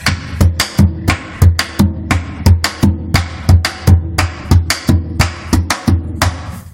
Este es el sonido de un tambor tocado en la parranda, género musical venezolano. Este instrumento está fabricado de madera y cuero. Lo grabamos como parte de una entrevista realizada a Rafael Rondón, director del grupo "El Valle". Se realizó una grabación simple con un celular Sony y luego se editó con Audacity, se ecualizó y normalizó.
"This is the sound of a drum played on the parranda, a Venezuelan musical genre. This instrument is made of wood and leather. We recorded it as part of an interview with Rafael Rondón, director of the group "El Valle". A simple recording was made with a Sony cell phone and then it was edited with Audacity, it was equalized and normalized."
cuero, Parranda, pujao, Tambor